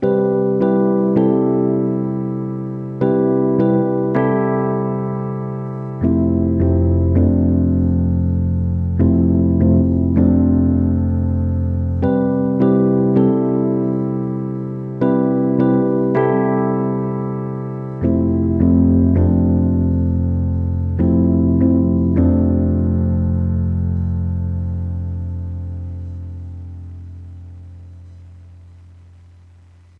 Lofi Downtempo Keyboard / Rhodes Loop Created with Korg M3
80 BPM
Key of C Major
Portland, Oregon
May 2020
80BPM, digital, keyboard, korg, loop, rhodes